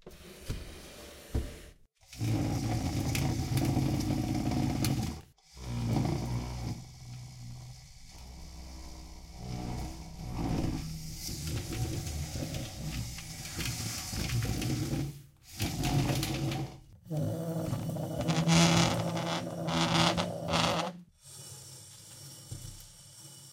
Dragging furniture
Check out my first game on Playstore:
floor, squeaky, stone, open, tiled, sliding, dragging, furniture, chair, squeeky, wooden, close, slide, drag, closing, wood, door